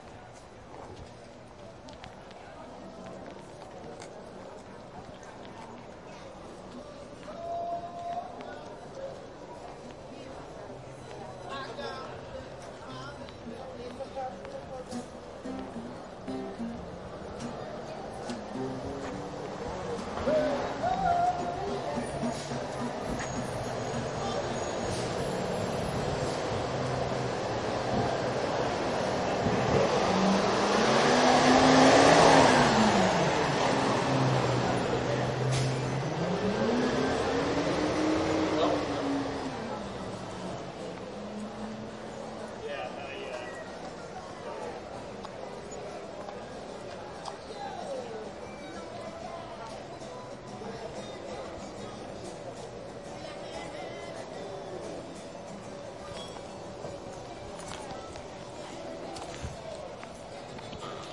Street Ambeince with street musicians in French Quarter
Recorded with an H4n Zoom in the French Quarter New Orleans.
French-Quarter, Musician, New-Orleans